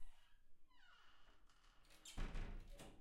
wooden door opening, binaural recording
door, open, squeaky, wooden